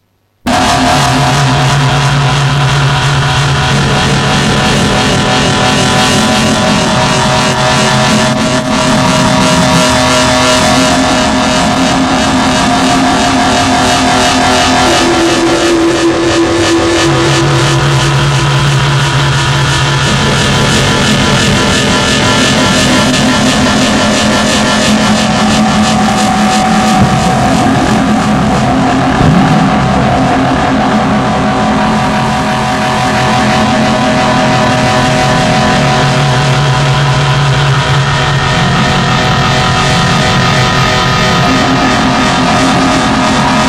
Part of robotic music on a nameless bar on a nameless place. Not even Skywalker puts his feet there. Different music, not amusing for humans, but robots seem to have taste for this noise.
songs, androids, music, aliens, concerts, robots